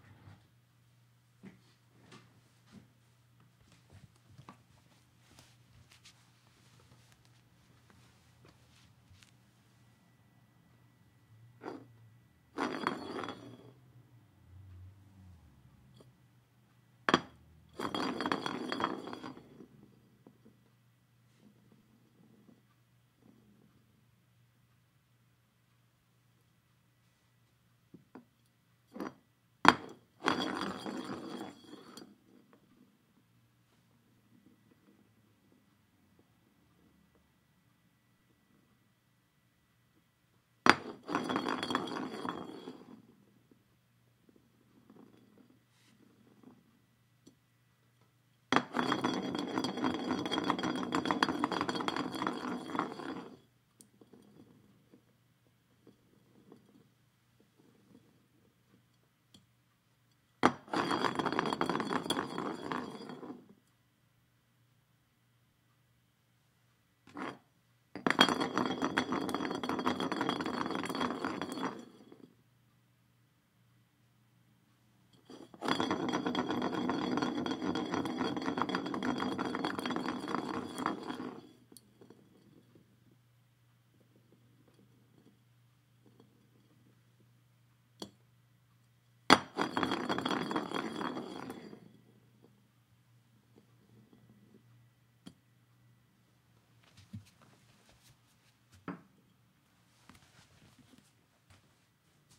Beer Bottle, Spin, Hardwood Floor
Spinning a beer bottle on a hardwood floor